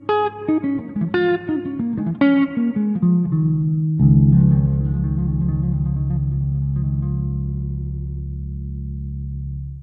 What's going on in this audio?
Smooth Guitar Solo Lick
Short 'outro' guitar lick.